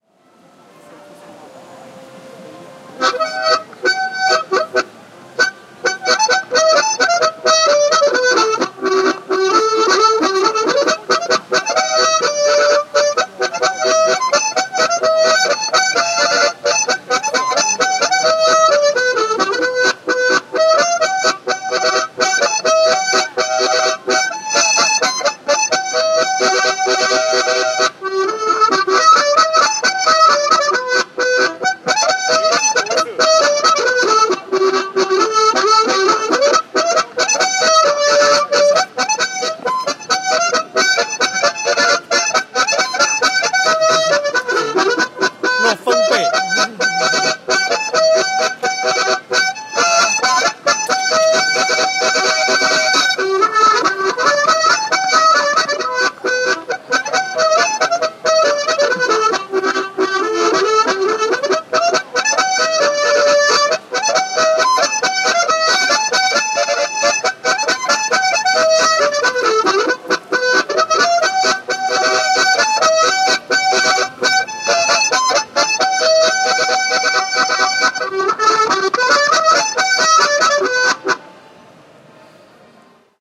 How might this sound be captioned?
Lu Xun Park Harmonica - Shanghai
Lu Xun Park in the Hongkou area of Shanghai is the traditional place for aspiring musicians to gather and practice. Recorded here is a fine example of harmonica playing, Shanghai, People's Republic of China.
practice, musician, echo